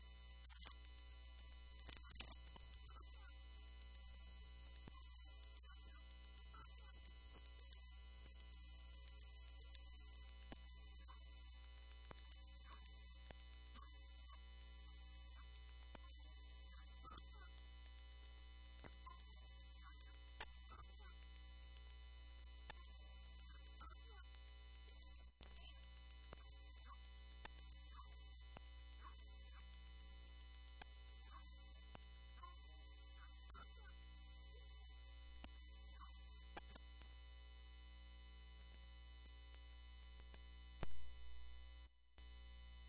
vtech circuit bend065
Produce by overdriving, short circuiting, bending and just messing up a v-tech speak and spell typed unit. Very fun easy to mangle with some really interesting results.
noise, speak-and-spell, micro, music, broken-toy, digital, circuit-bending